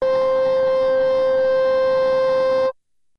the remixed samples / sounds used to create "wear your badge with pride, young man".
as suggested by Bram